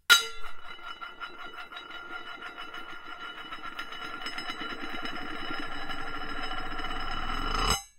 Recorded with H4n - Dropped a biscuit tin lid and recorded as it rotated to a stop. This version spun for the longest period.